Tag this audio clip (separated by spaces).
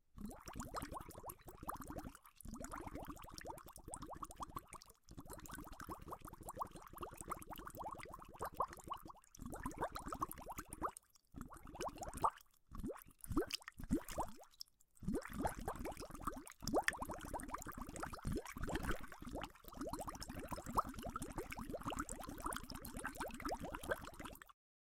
bubbling glass straw bubbles water liquid